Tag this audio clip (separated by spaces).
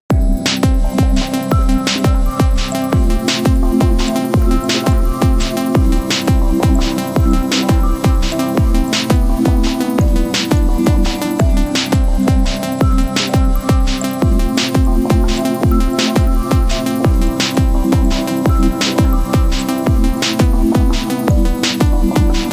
loop electro bass ableton music electronic drum live rhythmic pop beat harmonix synth dance